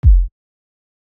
Electronic Kick sound.
Instruments, samples and Max for Live devices for Ableton Live: